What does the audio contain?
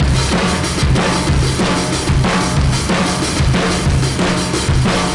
Drum recording from live session with Fur Blend - 2 Mic recording onto 3M M79 2" tape at Greenmount Studios